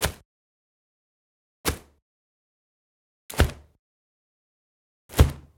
Dropping Compost Bag on Floor
Recording of me dropping a compost bag on the floor.
Low Thud.
Recorded with a Zoom H4N Pro field recorder.
Corrective Eq performed.
This could be used for the action the sound suggests. I also used it (with high end removed), to represent someone dying and falling on the floor.
drop, heavy-thud, hit, compost, dying, bag, thud, low-thud, impact, fall, dropping, body-fall, floor, death, heavy, compost-bag, body